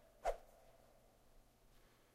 Sound of a dowel being whisked rapidly through the air, in an attempt to make the sound of a flying arrow.